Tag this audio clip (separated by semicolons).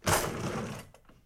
drawer; house; houseware; kitchen; knife; metal; open